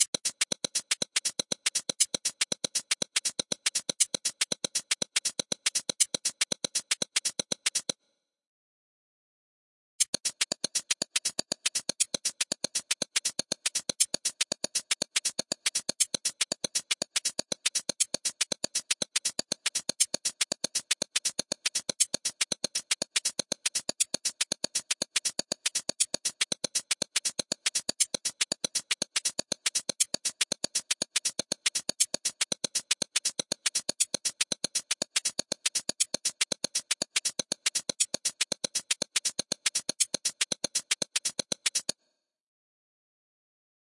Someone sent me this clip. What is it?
Hi Hat Rythm Groove #1 (Dry/Random PitchPan)
First Part was Dry.
The Second part with a Random Pitch and Pan effect.
Enjoy and have Fun!
loop, hi-hat, quantized, percussion-loop, drum, groovy, percs, percussion, rhythm